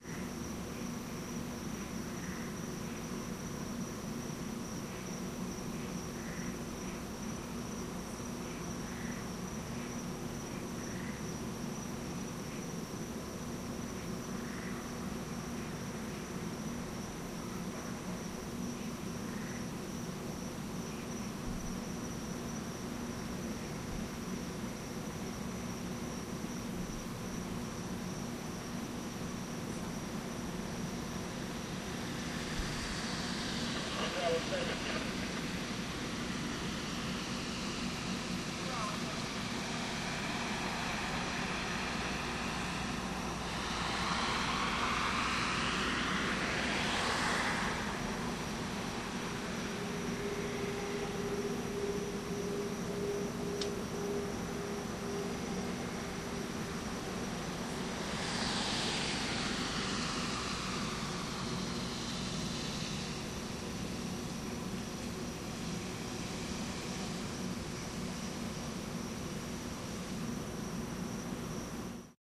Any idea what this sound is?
police chopper9 gone5 radio
Police helicopter and a dozen cop cars, including a K-9 unit searching the hood, recorded with DS-40 and edited in Wavosaur. Police cruiser passes by my balcony with his window open and police radio up loud as dispatch responds.